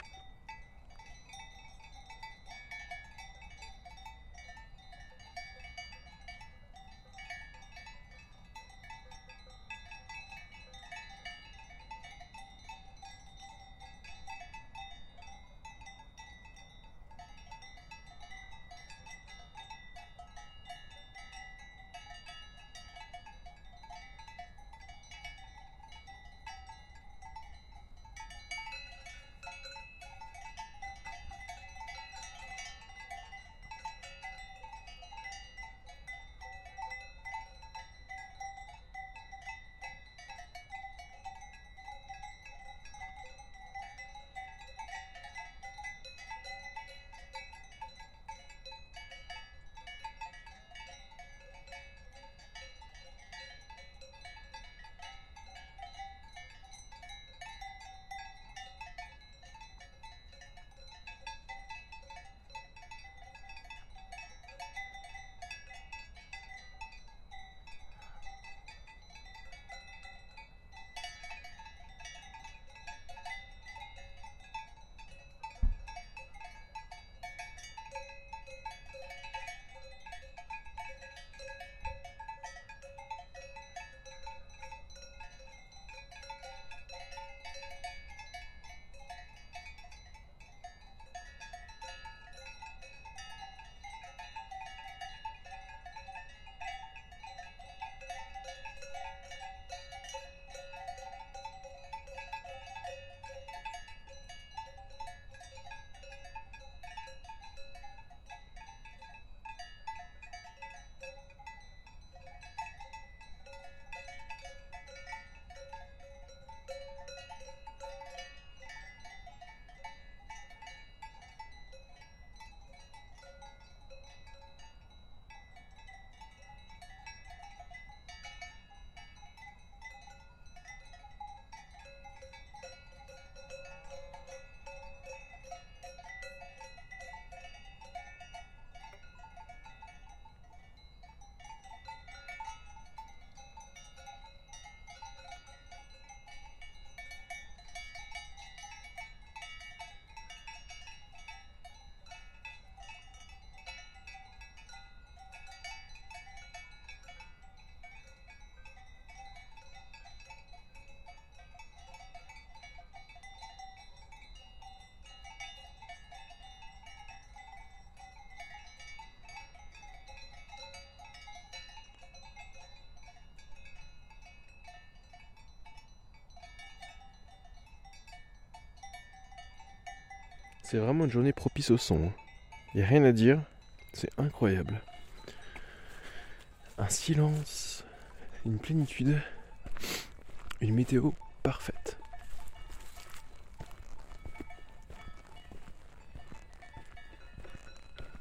Some cowbells in the Crête de Geruen, Alpes de Hautes Provence.
Recorded with Tascam HD-P2 and Schoeps Mk4
Alpes-de-Haute-Provence, te-du-G, Alps, Vaches, Nature, Cow-bell, Montagne, Field-recording, Cow, Cr, Mountain, Cloches, ruen, bells